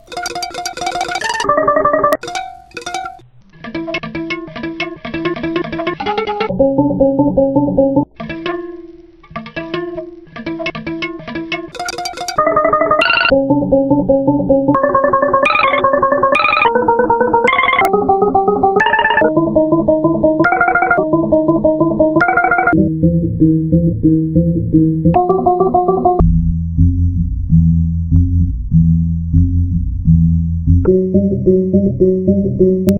When you listen to this sound, remember that the instrument consists of a empty Heineken beer can, on which I tied four rubber strings of the kind you find in all kindergartens, on office's desks and in mothers's kitchen drawers. Not bad, isn't it ! I have no proffessional mixer board and exclusive synthesizer, soI have to use all sorts of stuff like empty bottles, tin cans, wine glasses, metal tubes, maculated stuff of all sorts, paper rolls, metal strings....anything. My wife pretend not knowing me when we are shopping, because I ping with my car keys on everything to see if there is a useful sound. I envy those who have all sorts of electronic stuff.
beer, rubber, can, strings